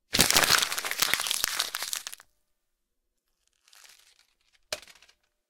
Paper crumple
Crumpling paper.
{"fr":"Froissement de feuille","desc":"Froisser une feuille de papier.","tags":"papier page feuille froisser"}